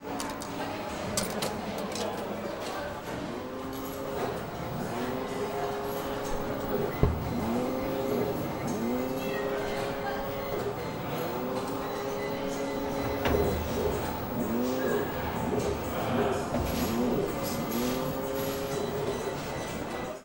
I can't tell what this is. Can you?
Soviet Arcade - Champion - Game
Soviet arcade racing buttons sounds. A lot of background noise.
This recording was made in Moscow, Russia as a part of my project for Location Sound module in Leeds Beckett University.
soviet, game, arcade